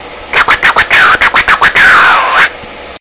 scratching sound effect made with my mouth